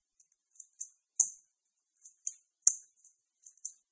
shooting a plastic bb gun
gun, airsoft, plastic, gun-cocking